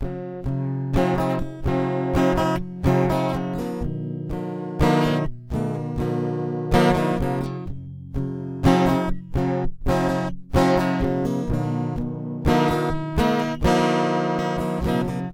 Samples of a (de)tuned guitar project.
chord, loop